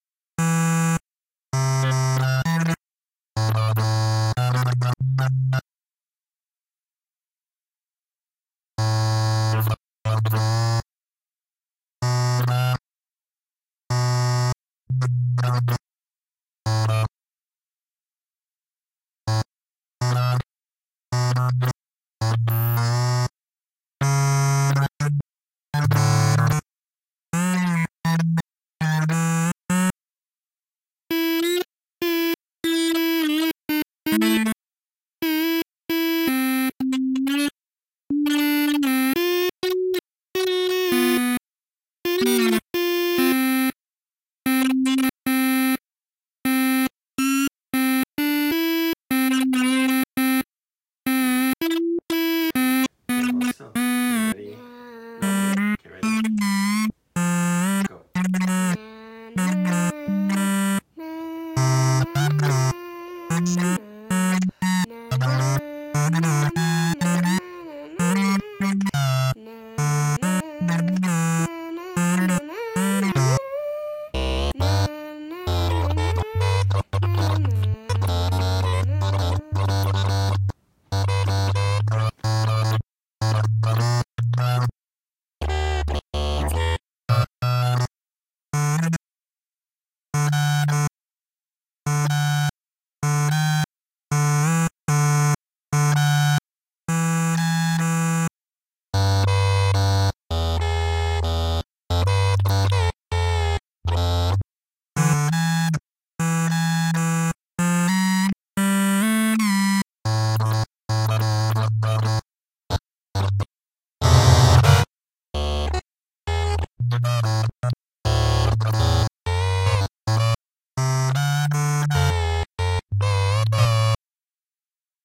Various exclamations and electronic speech patterns, improvised using wavetable synthesis and envelope modifcations, with random pitch shifts and vowel/consonant/exclamatory sounds coming through the droid speak.